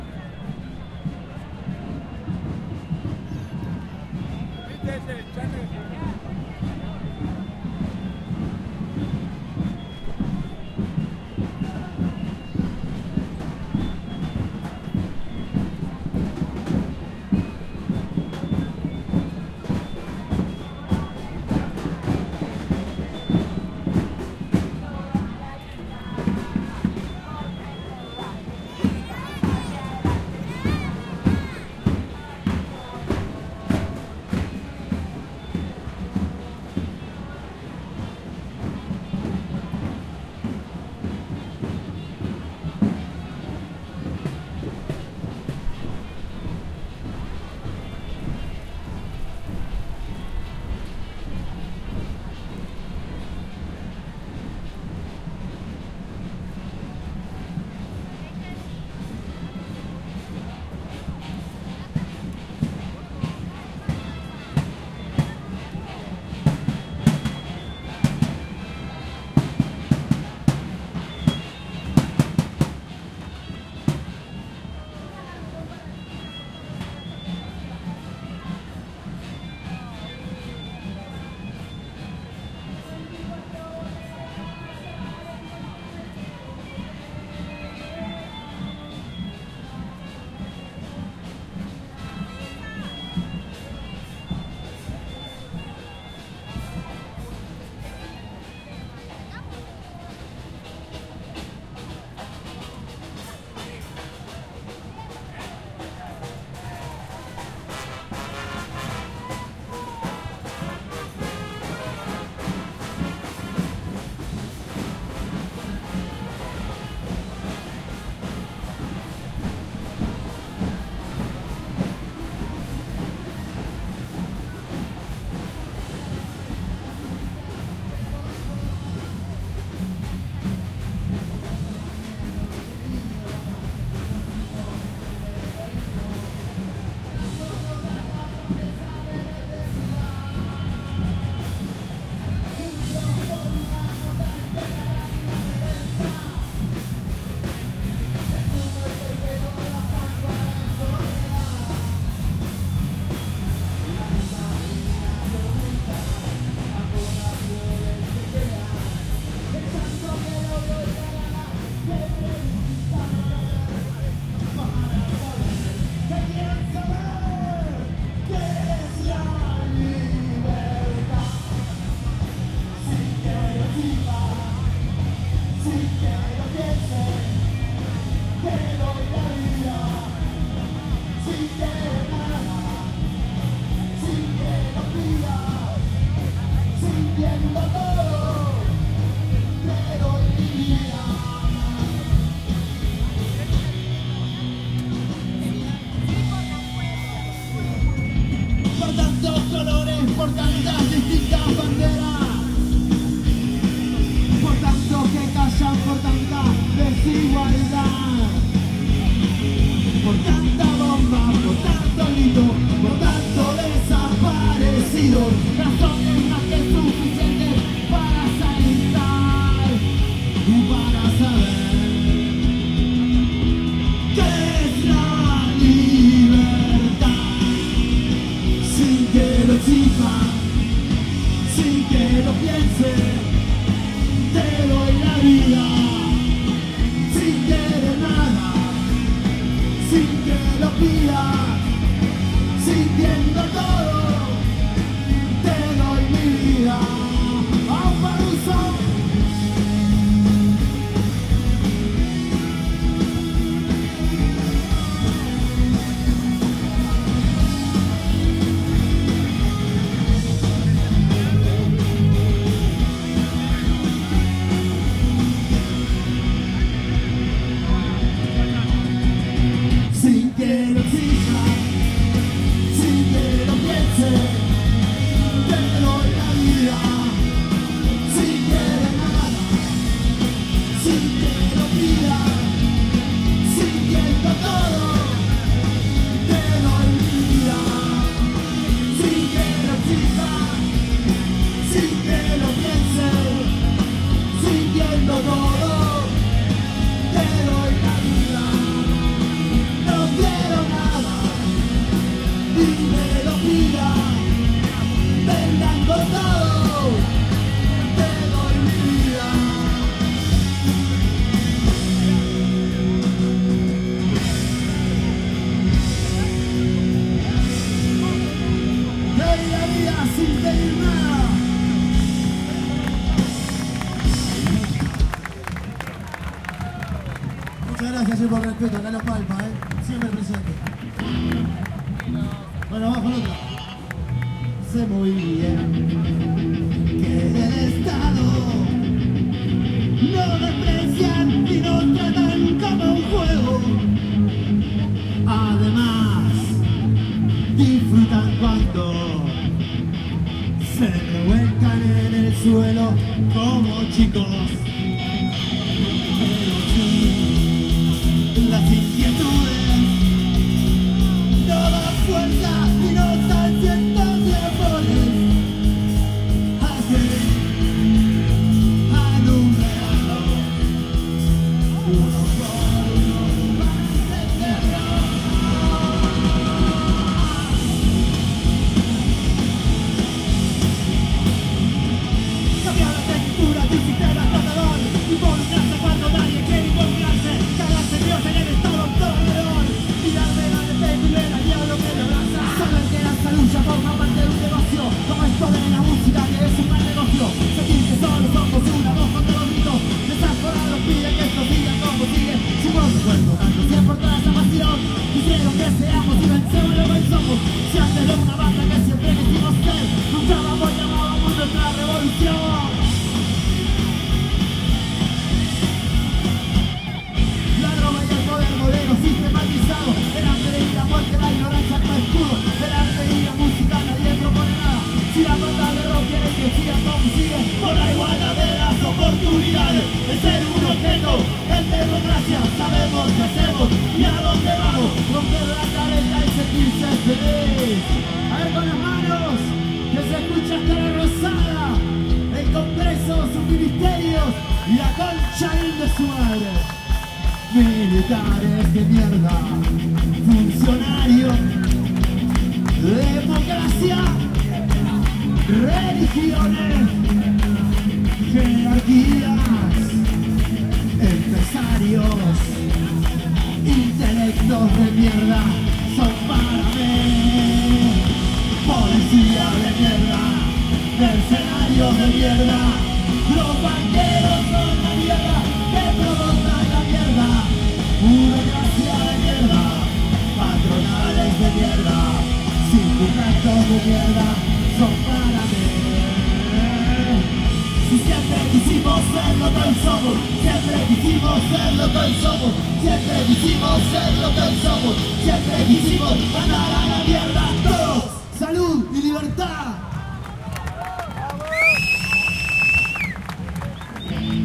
Caminando desde el Congreso de la Nación por Av. de Mayo hasta el camión del Partido Obrero.